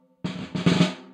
Snaresd, Snares, Mix (20)
Snare roll, completely unprocessed. Recorded with one dynamic mike over the snare, using 5A sticks.
drum-roll, roll